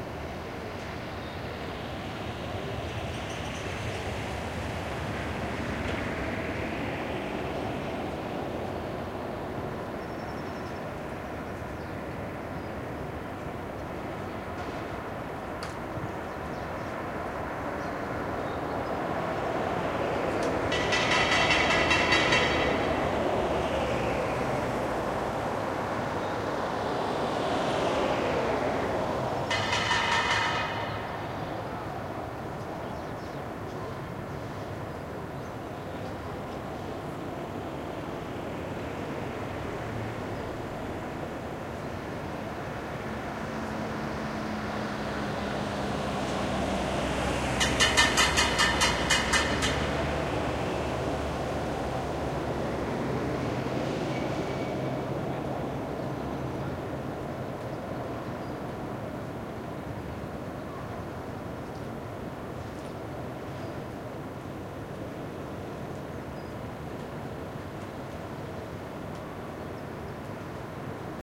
streetlife butane salesman 1
Street salesman of butane gas cylinders (Barcelona).
Recorded with MD MZ-R30 & ECM-929LT microphone.